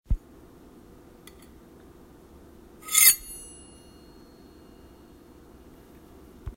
Big Metal Shine 02
Sound of a metal utensil being quickly drawn across a hard surface and allowed to ring. Could be used as a sword glint kind of sound.